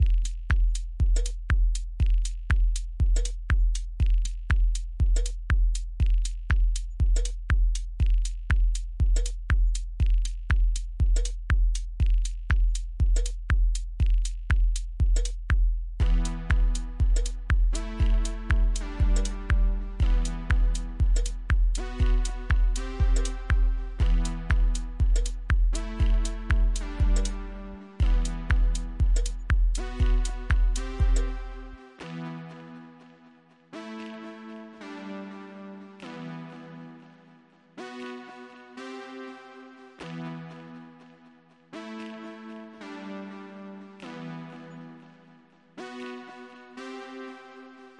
Minimal House backstage loop pattern
Made in FL11 with editing in mind, pretty sample with a theme idea in the form of the chords.